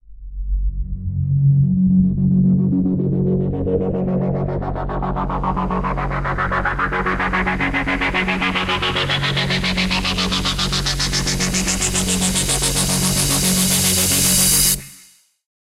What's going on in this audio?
Futuristic Suspense
Futuristic sounding build up with lots of distortion and then quiet.